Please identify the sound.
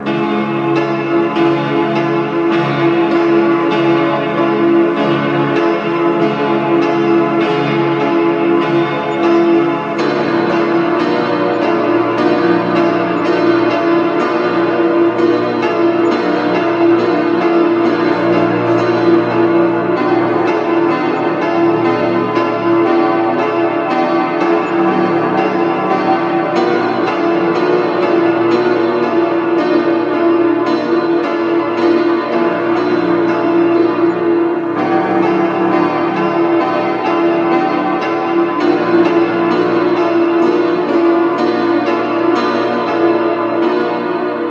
hope u like it played it on a upright piano its mint and the piano 70 years old :)